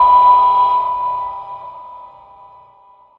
a dark bell sound
effect, industrial